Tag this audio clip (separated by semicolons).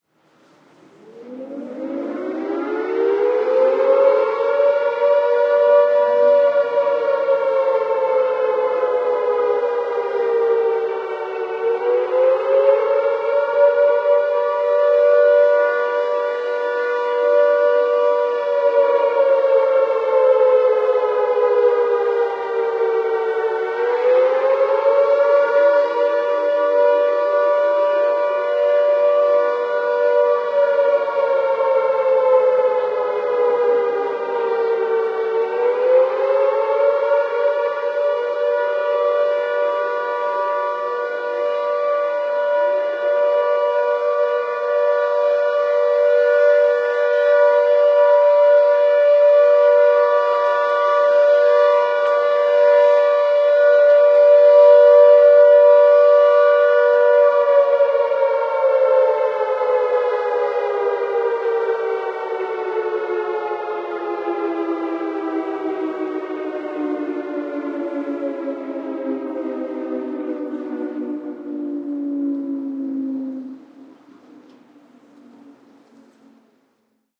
Air; raid; siren